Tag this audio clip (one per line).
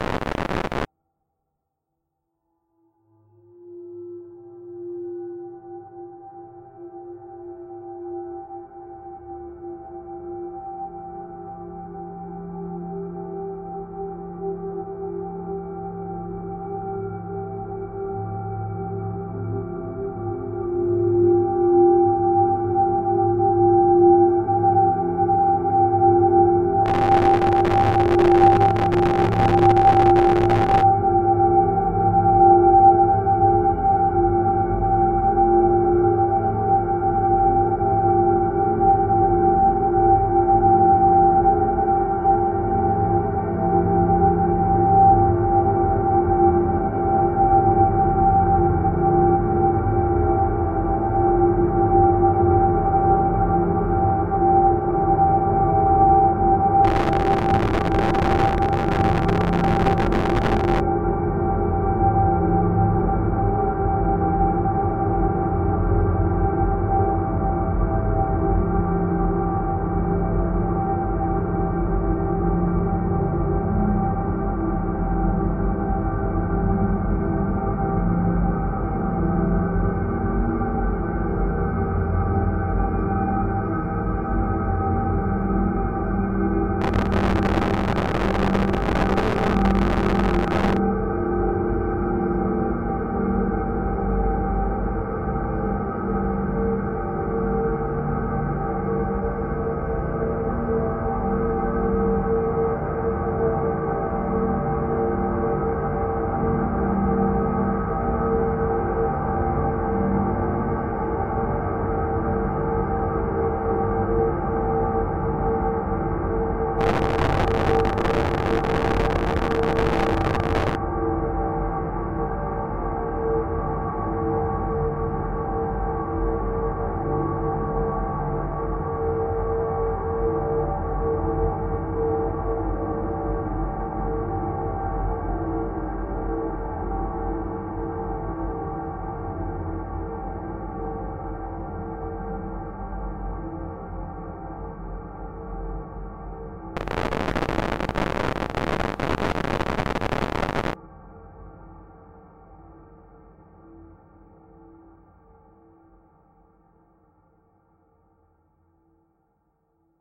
evolving
ambient
artificial
soundscape
experimental